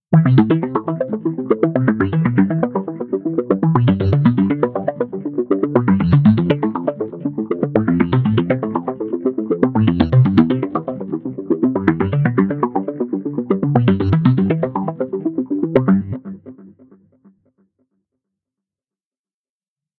ARP D - var 6
ARPS D - I took a self created sound from the Virtual Korg MS20 VSTi within Cubase, played some chords on a track and used the build in arpeggiator of Cubase 5 to create a nice arpeggio. I used several distortion, delay, reverb and phaser effects to create 9 variations. 8 bar loop with an added 9th and 10th bar for the tail at 4/4 120 BPM. Enjoy!
synth, melodic, bass, 120bpm, sequence, arpeggio, harmonic